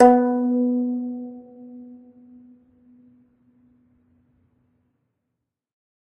single string plucked medium-loud with finger, allowed to decay. this is string 14 of 23, pitch B3 (247 Hz).